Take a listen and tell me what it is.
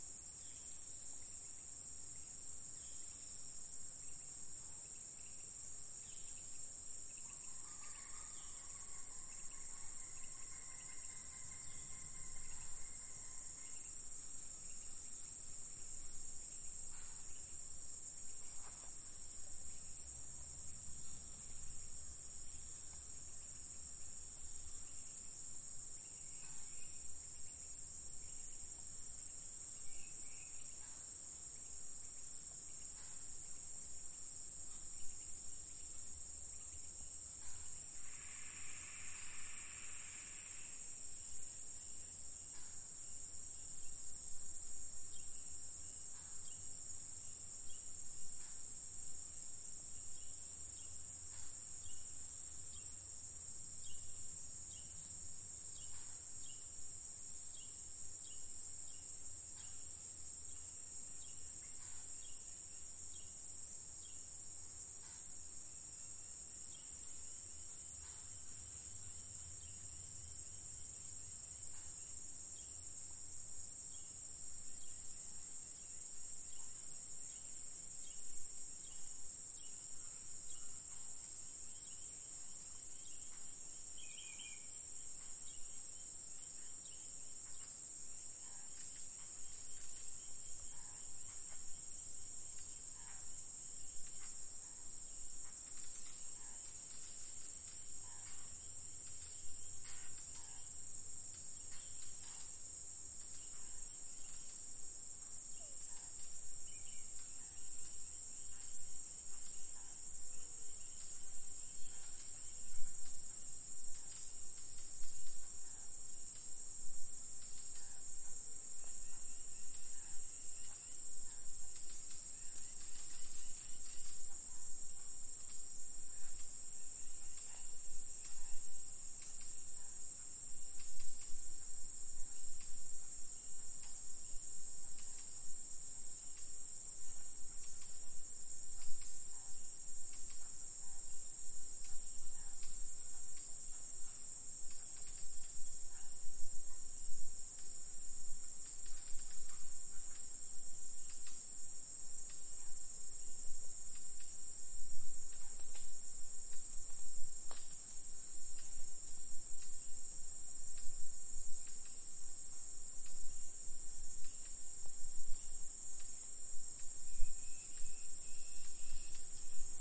Forest Evening #1
Stereo field recording taken at 6:15PM deep in the woods of rural North Carolina on the eastern seaboard of the United States. Largely free of human sounds.